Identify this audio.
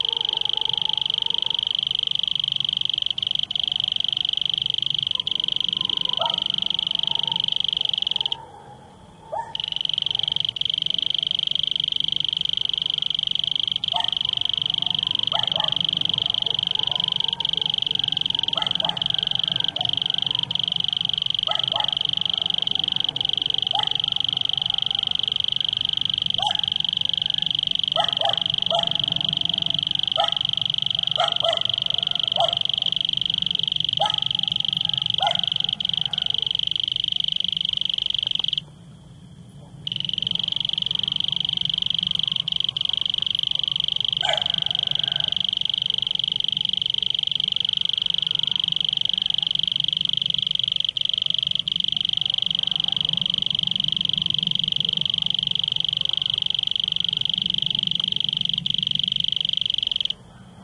20080321.crickets.dog
Strong cricket call in foreground, a small dog barks close, larger dogs in the distance. Lots of natural reverberation. Sennheiser MKH60 + MKH30 into Shure FP24 preamp, Edirol R09 recorder
cricket, insects, nature, night